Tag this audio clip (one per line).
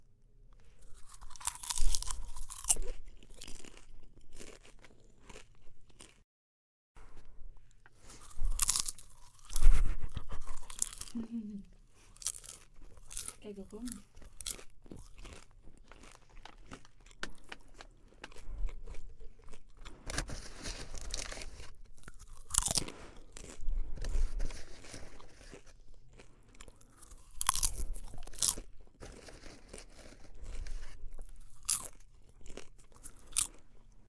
hungry
food
snack
mastigando
chewing
eating
popcorn